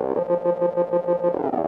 modular love 04
A rhythmic noise made from a sample and hold circuit modulating at audio frequencies. Somewhat alarm-like but weirder. Created with a Nord Modular synthesizer.
glitch, buzz, noise, beep, filter, rhythmic, digital, click